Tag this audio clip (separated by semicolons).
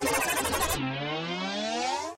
record-scratch; dj; lmms; scratch; scratching